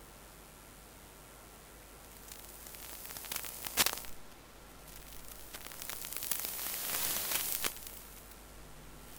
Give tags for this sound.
buzz; cable; electric; electricity; electronic; fault; faulty; hiss; noise; sparking; Sparks; static

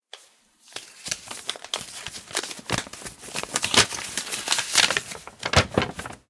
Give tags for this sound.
bottle-message; fold; foley; little; magazine; news; newspaper; page; paper; piece; secret; slow; sound; sound-design; unfold

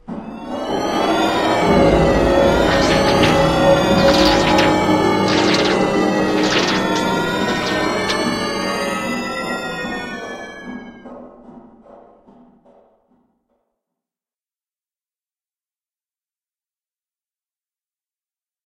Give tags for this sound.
magic; swoosh; time

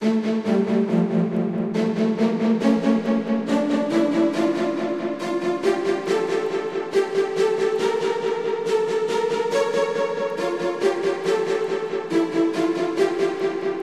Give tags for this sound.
classic; ensemble; orchestral; strings

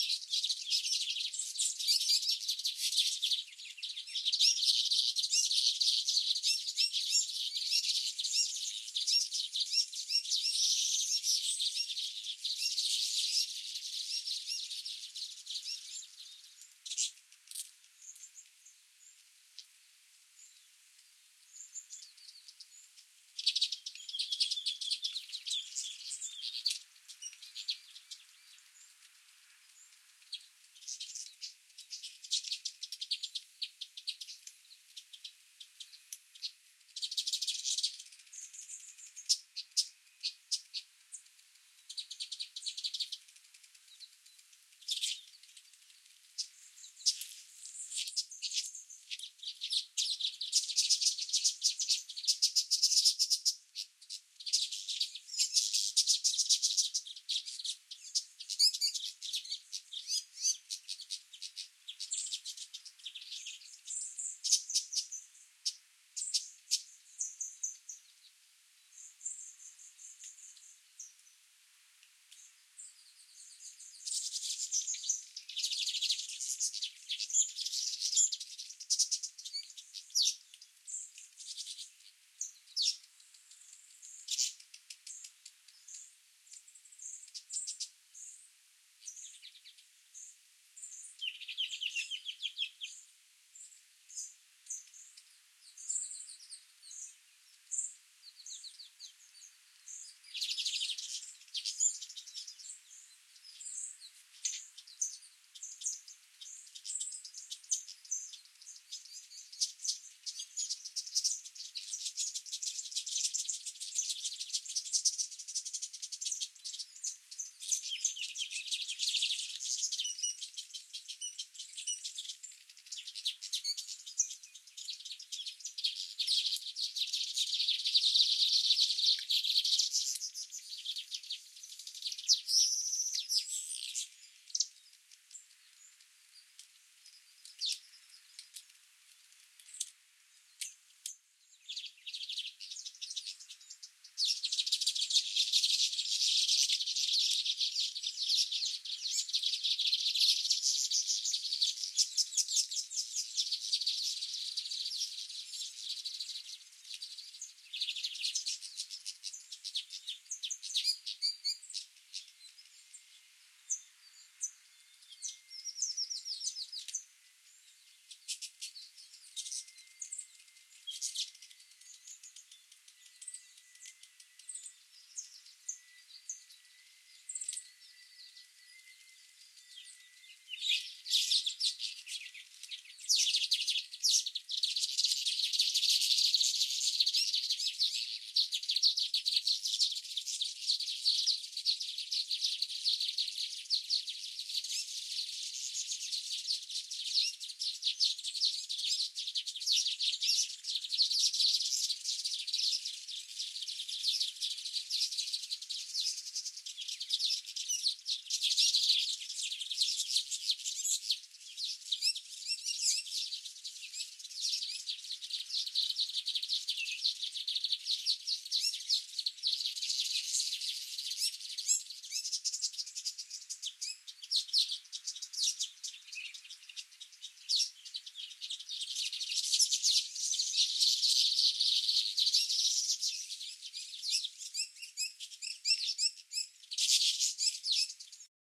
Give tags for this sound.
bird; birds; birdsong; chirping; field-recording; island; nature; nest; outside; singing; summer; tropical

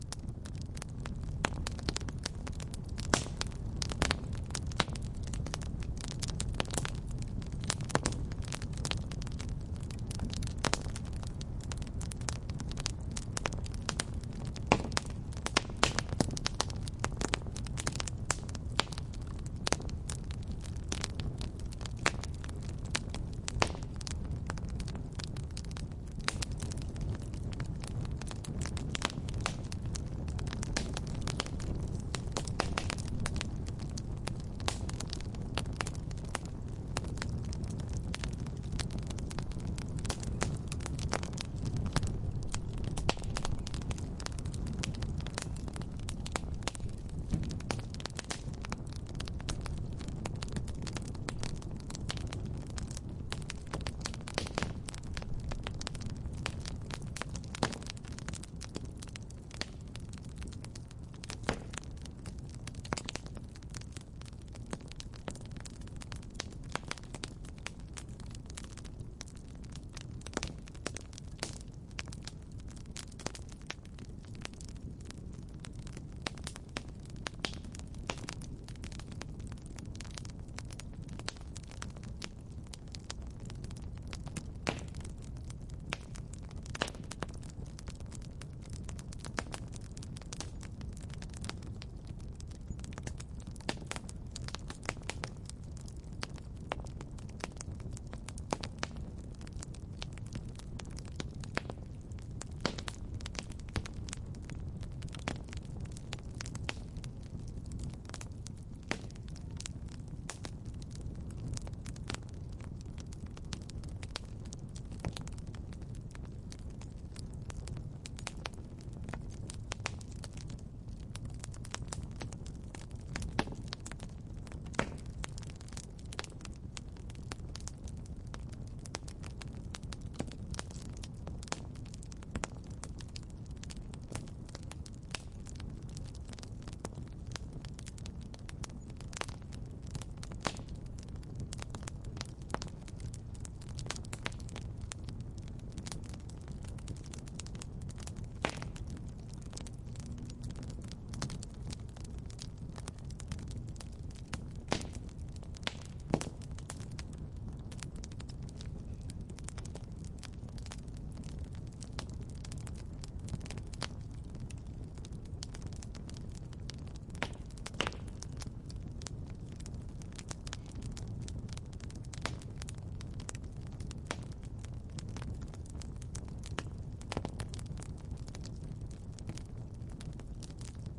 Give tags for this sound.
hiss; pop

